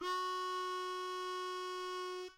Harmonica 3 hole draw
I recorded the sound of a single 3-hole draw.
Note, Single